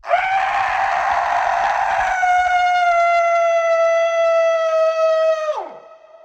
Banshee Scream Monster
Woman scream with some pitch filters and room reverb
squeal, yell, banshee, woman, ghost, cry, haunting, scream, pain, shriek, monstrous, torment, agony, monster, evil, schrill, haunted, anger, screech